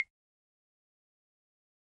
percussion sound in Dminor scale,...
itz my first try to contribute, hope itz alright :)
instrument, phone, africa, percussion